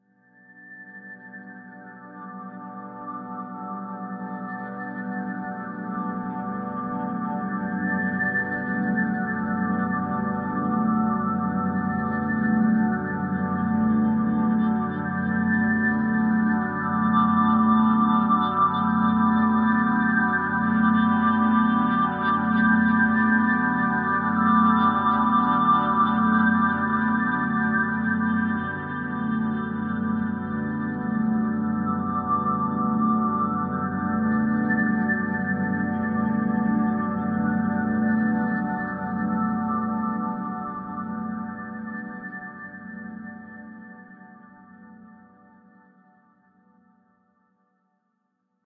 One in a small series of chords and notes from a digital synthesizer patch I made. A little creepy perhaps with some subtle movement to keep things interesting.